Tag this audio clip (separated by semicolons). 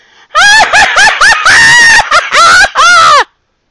giggle; laugh; laughing